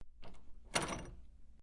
Opening a door handle